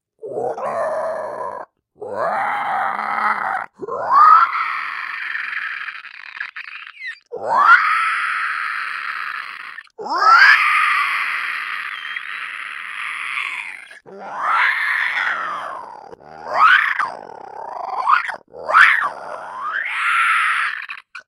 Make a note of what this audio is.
Monster Screaching
An odd noise that I can make by inhaling air and tightening my throat. Sounds like a monster howl.